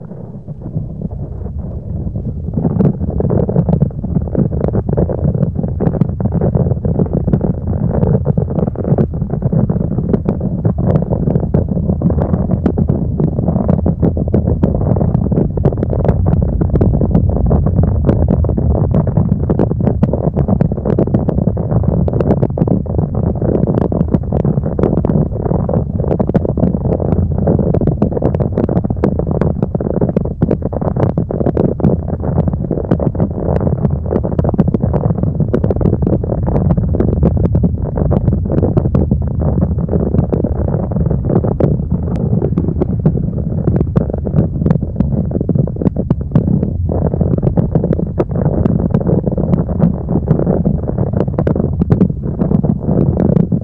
I just rolled a carpet inside in and put the microphone inside, and then, i scratched with my nails the exterior of the carpet
earthquake, terremoto, fx